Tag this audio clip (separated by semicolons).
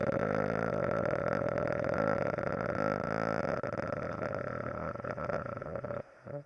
Evil; Ghost